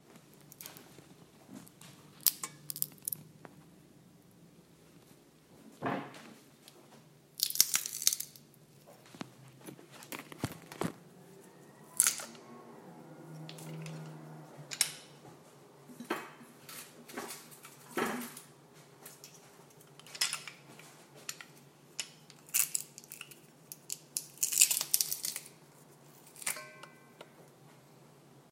garlic press mincer